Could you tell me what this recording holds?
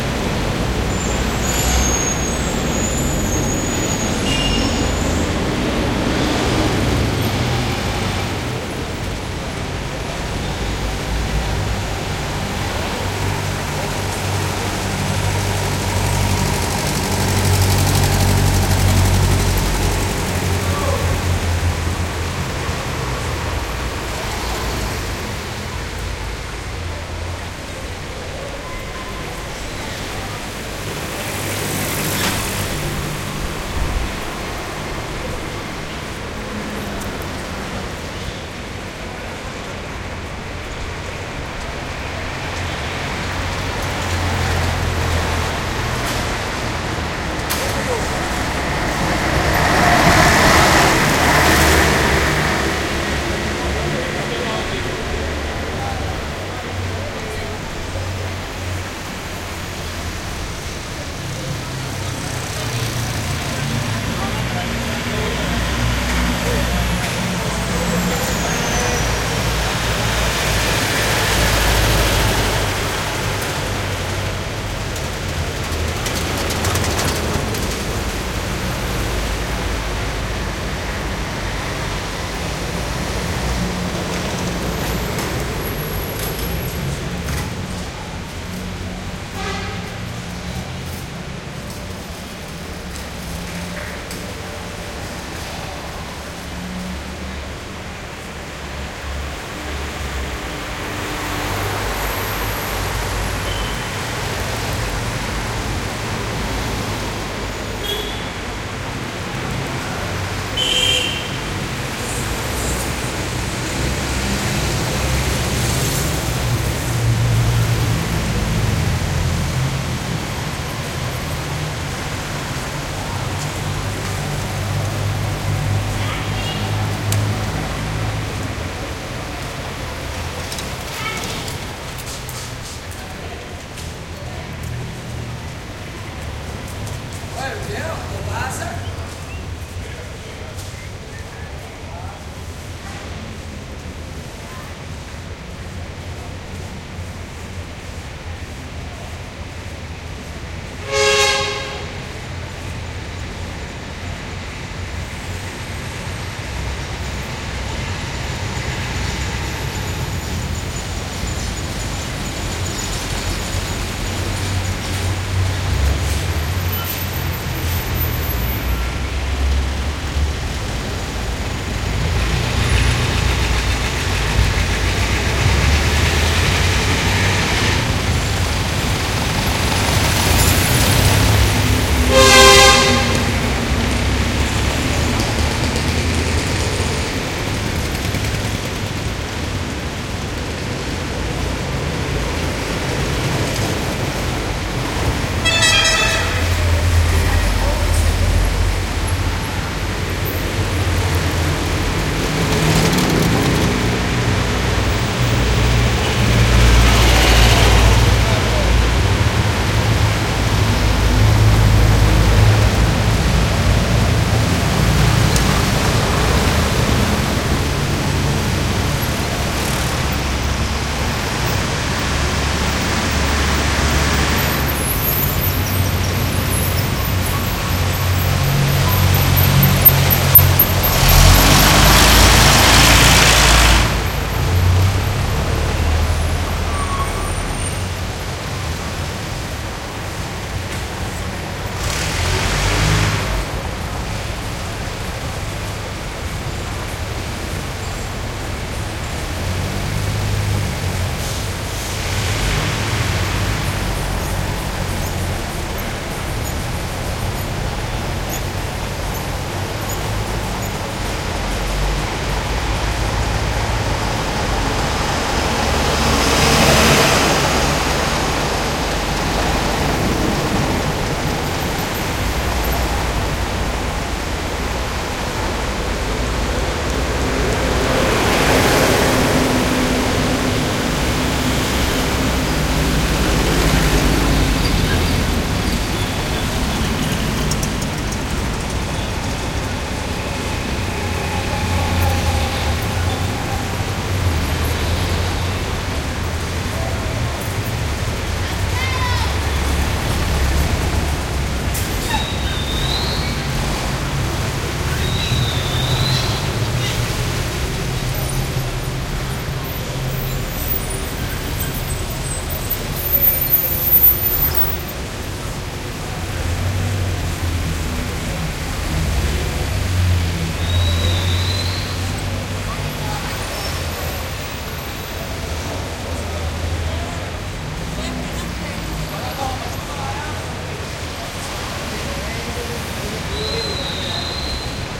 traffic heavy street cuba
Lots of throaty cars (vintage and otherwise) and trucks passing by a busy industrial street in Havana.
cars, traffic